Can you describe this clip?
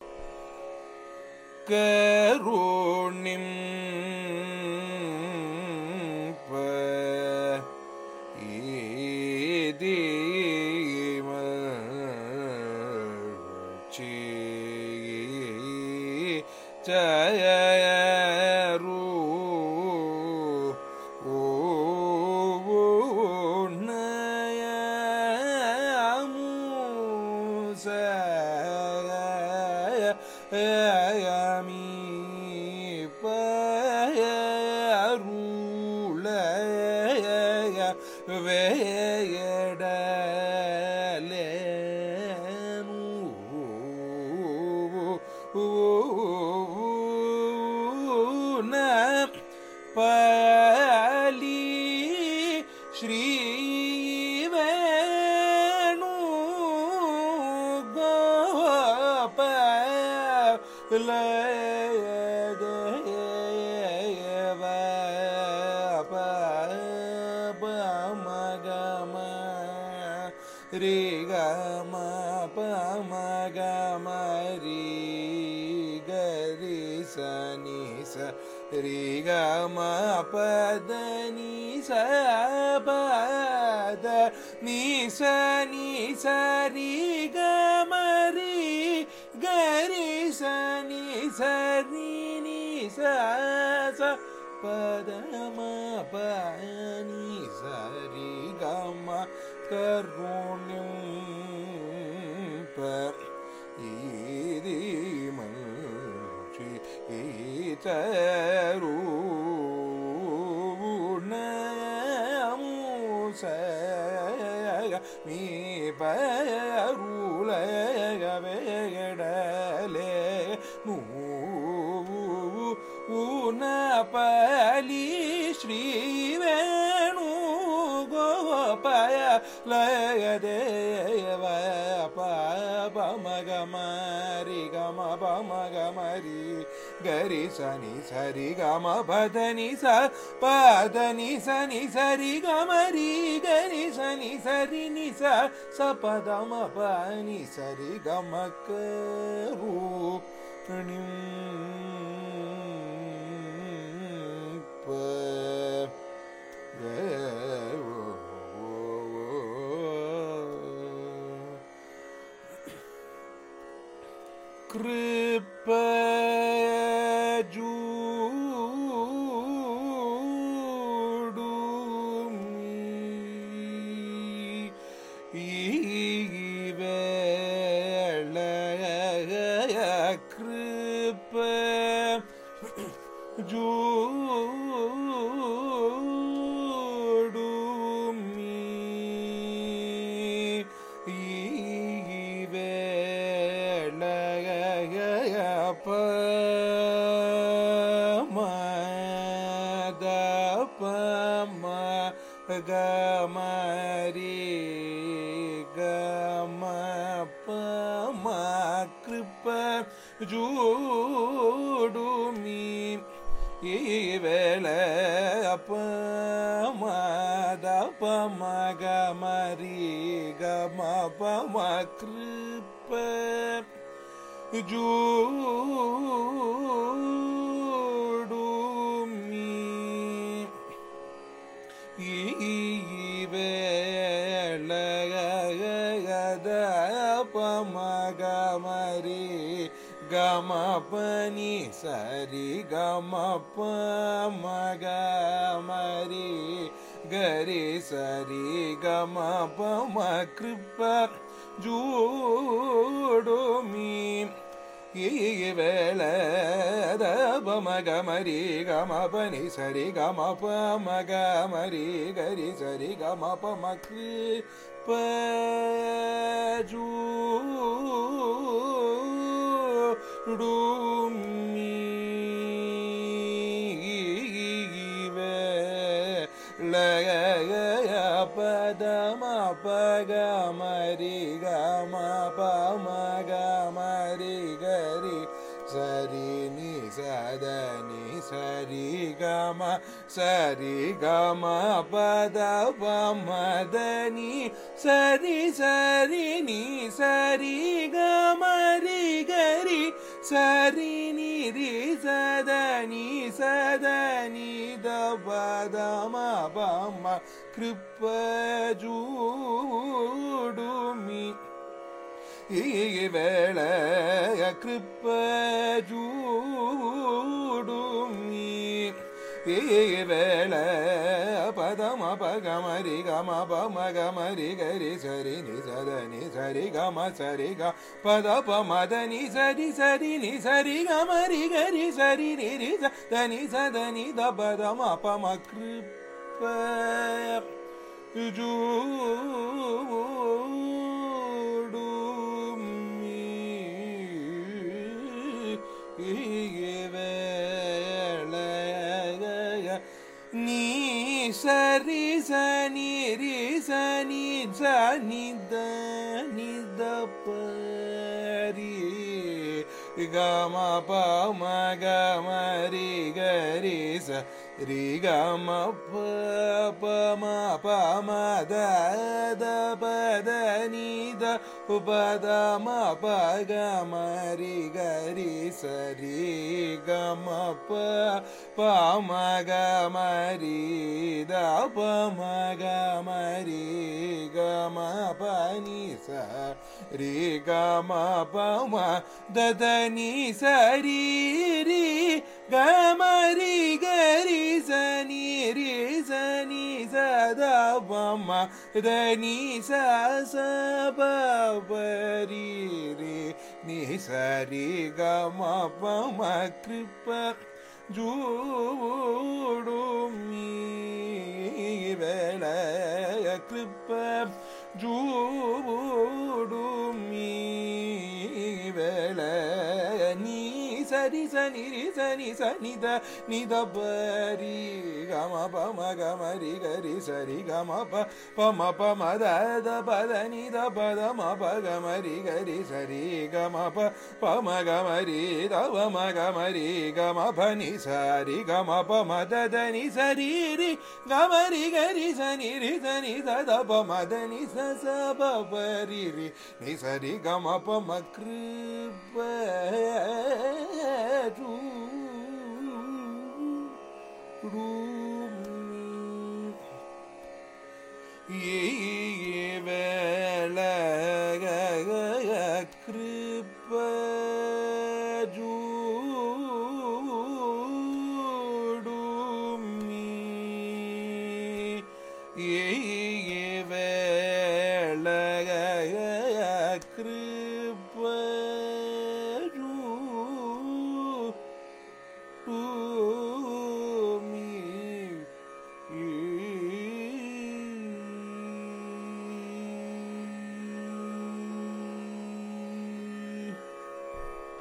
Carnatic varnam by Vignesh in Sahana raaga
Varnam is a compositional form of Carnatic music, rich in melodic nuances. This is a recording of a varnam, titled Karunimpa Idi, composed by Tiruvotriyur Thiyagaiyer in Sahana raaga, set to Adi taala. It is sung by Vignesh, a young Carnatic vocalist from Chennai, India.
carnatic, carnatic-varnam-dataset, iit-madras, music, compmusic, varnam